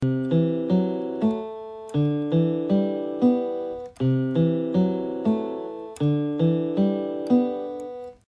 AITSOURA Amel son1
Strings, Multisample, Sounds